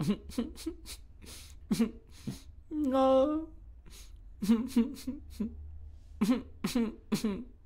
Man moaning
moan, man